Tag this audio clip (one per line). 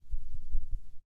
bird
Feather